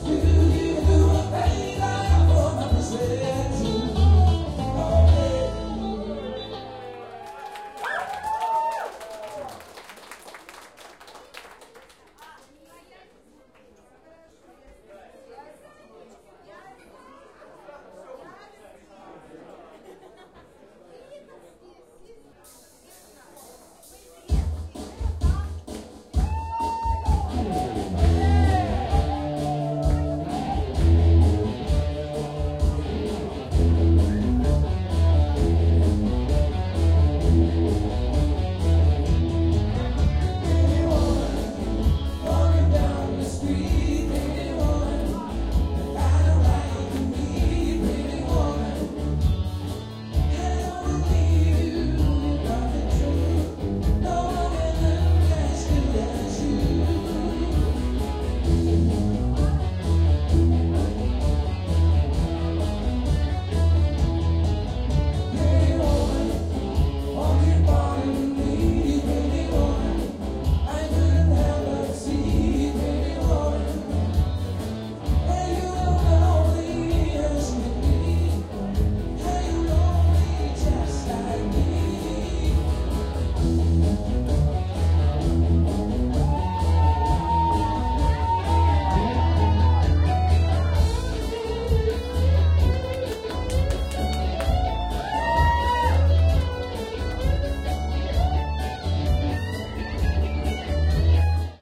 pub Vegas6
Atmosphere in the beer restaurant "Vegas" in the Omsk, West Siberia, Russia.
People drink and chatting and having fun, clinking glasses, dishes...
End of a song and start a new.
Recorded: 2012-11-16.
AB-stereo